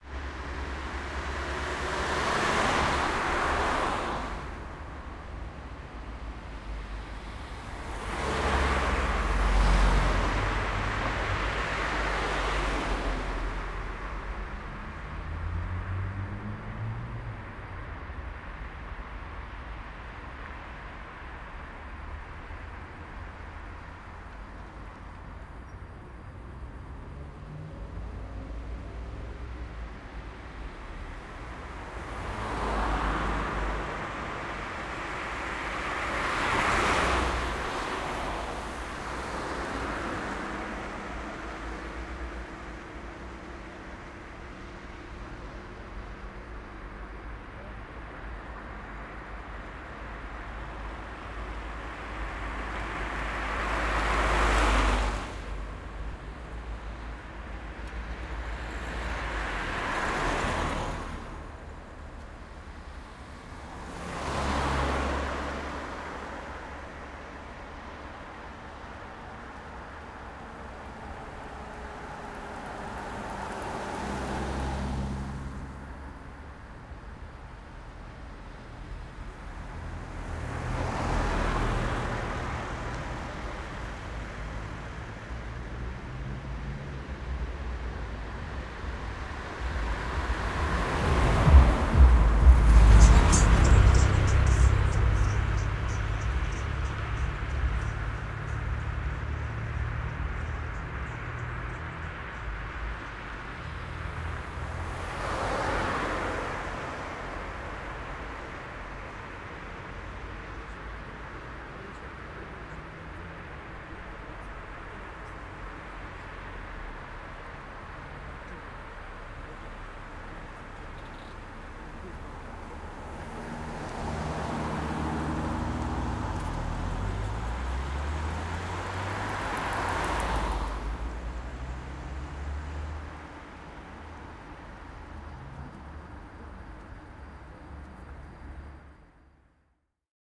tata hungary ady endre road 20080718
Summer Friday night in the city, cars going by. Recorded in the middle of a crosswalk using Rode NT4 -> custom-built Green preamp -> M-Audio MicroTrack. Unprocessed.